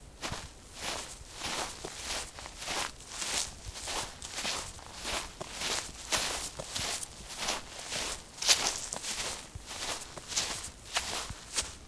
Walking through grass.